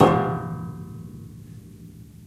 samples in this pack are "percussion"-hits i recorded in a free session, recorded with the built-in mic of the powerbook
hit, piano, string, unprocessed